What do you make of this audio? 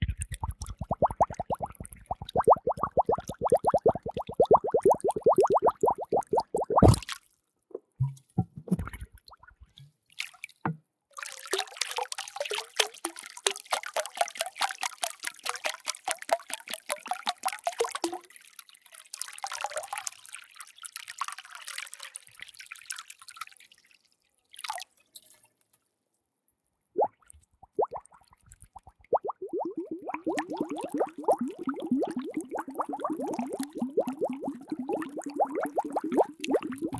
Water Bubbles and Splashes
bubble, bubbles, gurgle, liquid, splash, water